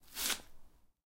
Apple Crunch 13
13/18 Apple related eating noises. Recorded in my studio with a matched pair of Rode NT5's in the XY configuration.
bite, eating